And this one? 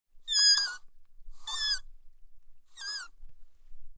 whistle grass
Whistle with a blade of grass.
A piece of grass is lying between two thumbs and blowing!
nature, whistlereed-instrument